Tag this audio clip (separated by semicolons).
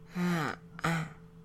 sound; woman